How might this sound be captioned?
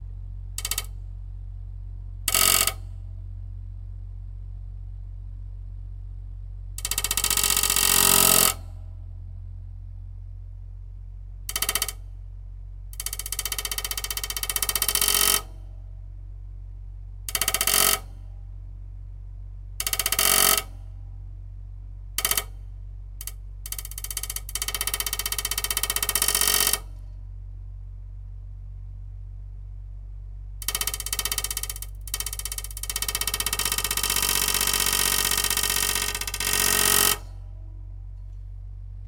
Stick in fan
clicking, fan, Stick